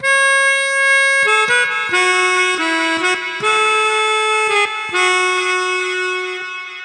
DW 140 F#M LIVE MEL LICK
DuB HiM Jungle onedrop rasta Rasta reggae Reggae roots Roots
DuB, HiM, Jungle, onedrop, rasta, reggae, roots